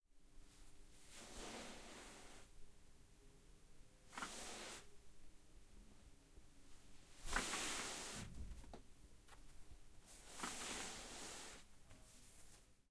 Pulling a blanket off of a chair: Cloth movement, chair creak.